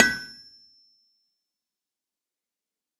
Anvil - Lokomo A 100 kg - Forging hot steel 1 time
Forging red hot steel on a Lokomo A 100 kg anvil once with a hammer.
1bar, 80bpm, anvil, blacksmith, crafts, forging, hot, hot-steel, impact, iron, labor, lokomo, metallic, metal-on-metal, metalwork, red-glow, smithy, steel, tools, work